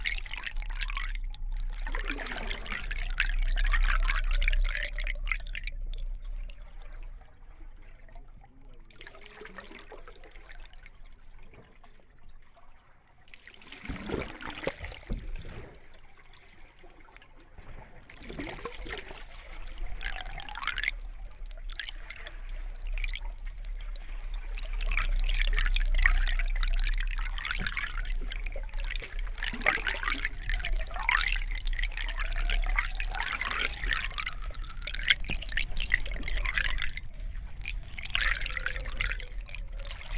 paddling in lake lbj 08232013 1
Noises recorded while paddling in lake LBJ with an underwater contact mic